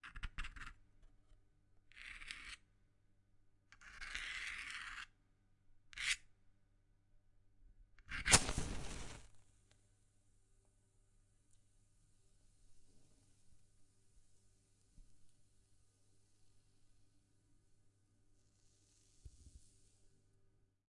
Trying to light a match and succeeded with a medium explosion.
recorded with Rode NT1000 through TLAudio Fatman FAT2 into RME Multiface
01, a, lighting, match